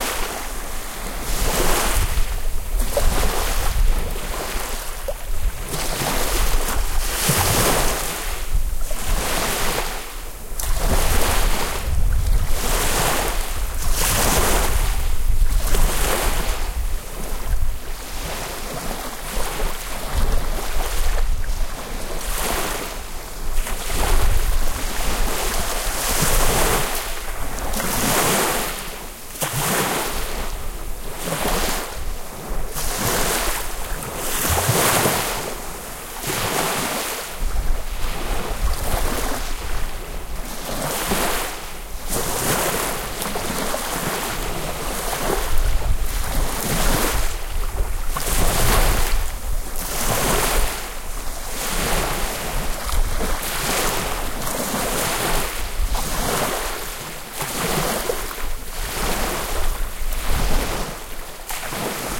Waves in the bay
Recorder Tascam DR-05
Location Darss / Baltic Sea 2019
Water, Bodden, Wellen, Fjord